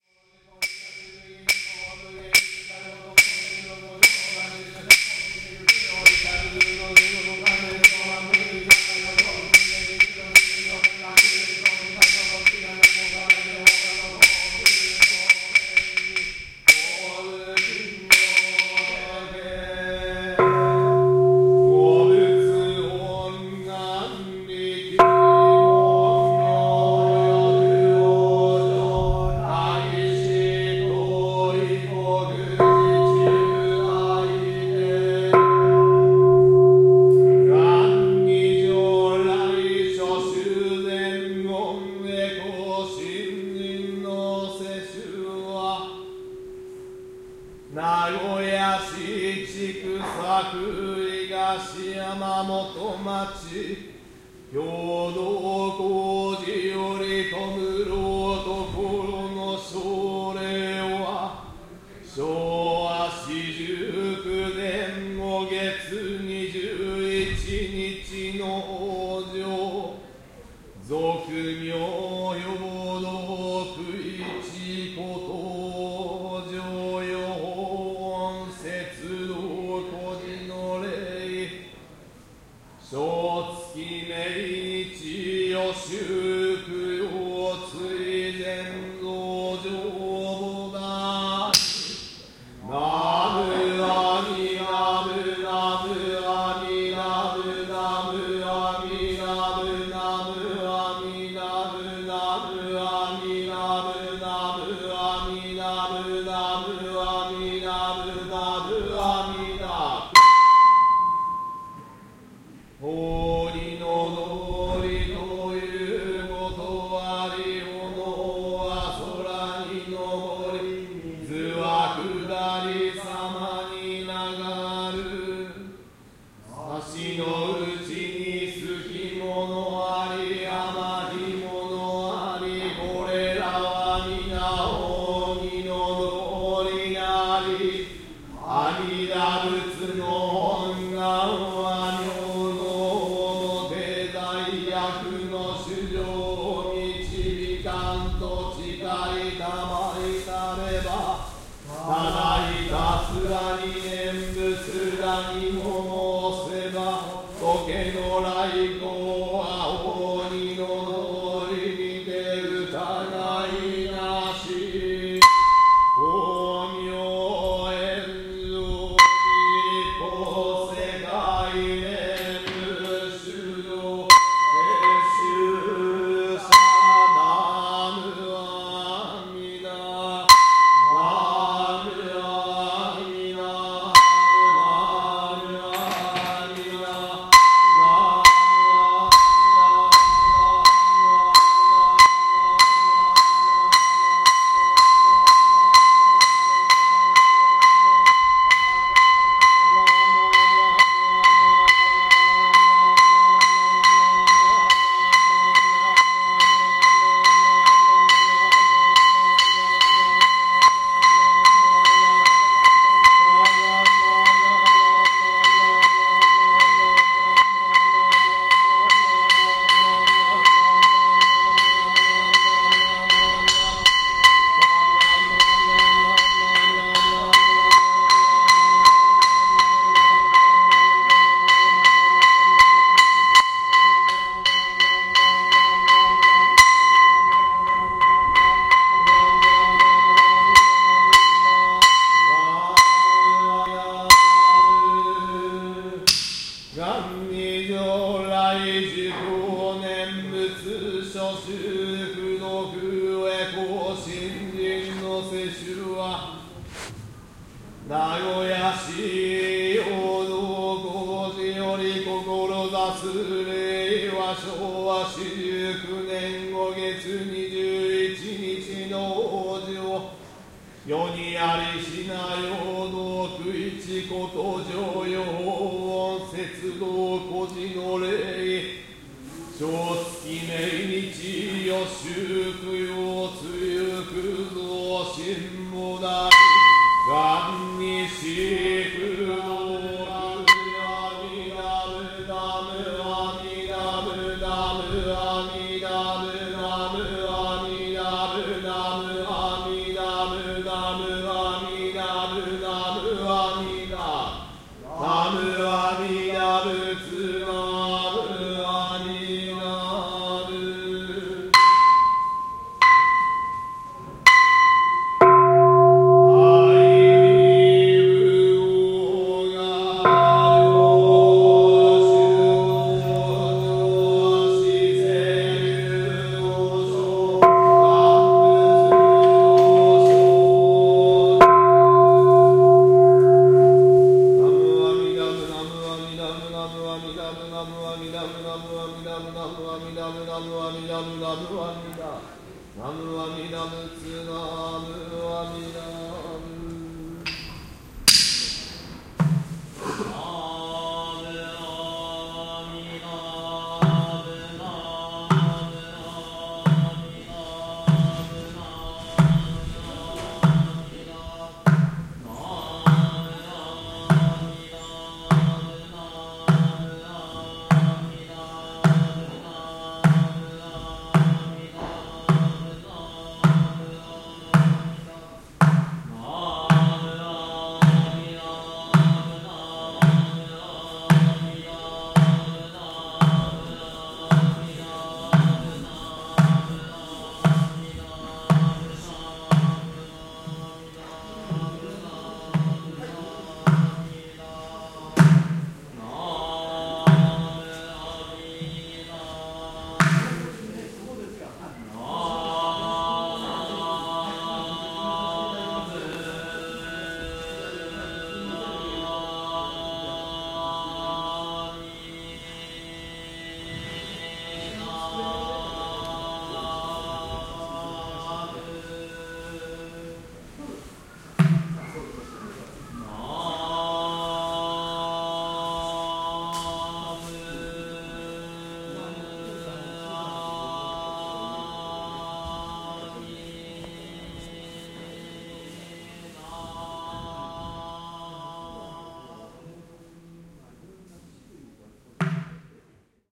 Chion-in Temple, Kyoto
Religious services at the ancient Chion-in temple of Jodo-shu (Pure Land Sect) Buddhism, Kyoto, Japan